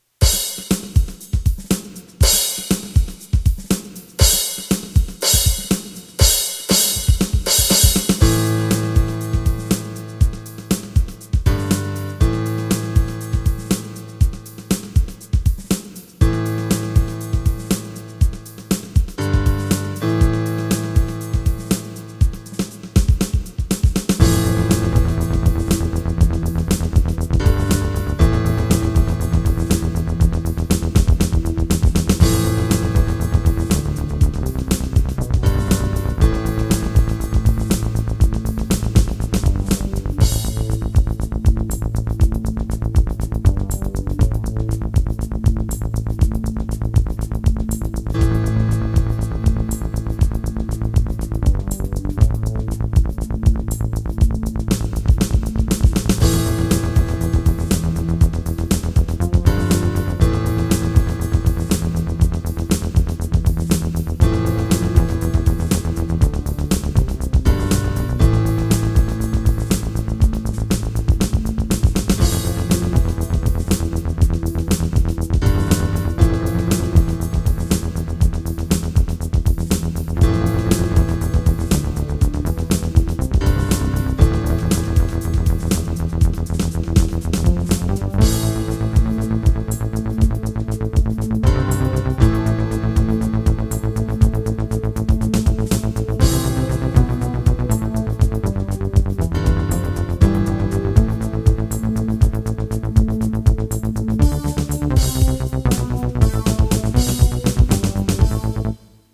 Entree Special Request
You've got 1 minute and forty-nine seconds (1:49) to tell your dramatic story. Recorded with a Yamaha keyboard using Audacity.
My first recording.
anxious, background, drama, dramatic, filler, suspense, thrill